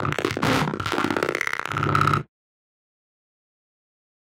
crispy bass
electronic synth made with Massive by Voodoom Production
electronic, nasty, synth